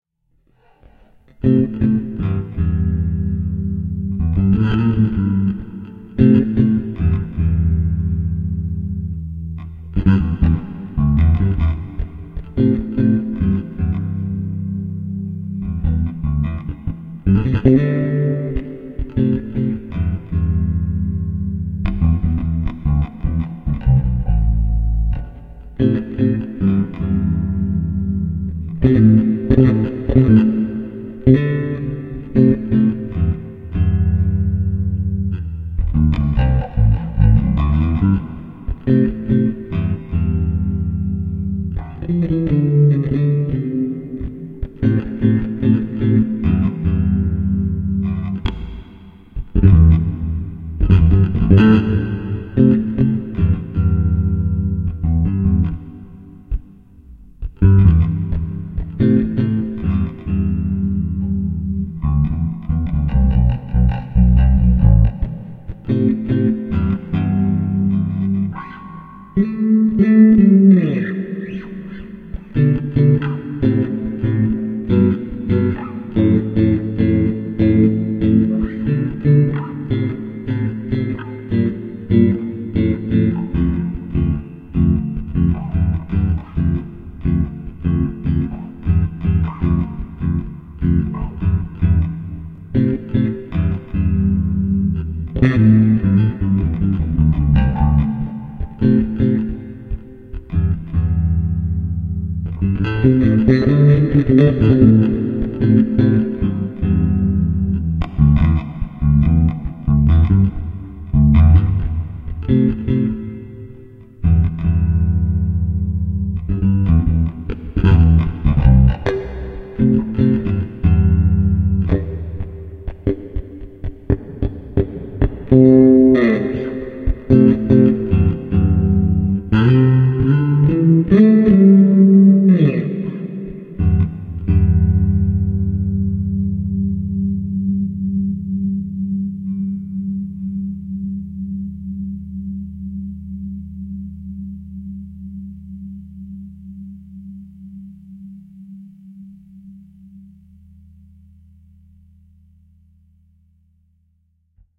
bass soloRJ
some time ago i played little bass guitar, that's whats left
recorded straight to daw.
bass, solo, live